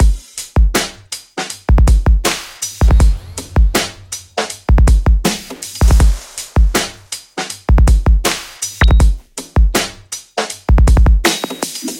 Created in Hydrogen and Korg Microsampler with samples from my personal and original library.Edit on Audacity.
kick, library, sample, pattern, hydrogen, edm, beat, bpm, loop, groove, drums, fills, korg, free, dance